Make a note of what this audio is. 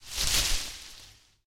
A single rustle of a bush
rustling, leaves, bush, rustle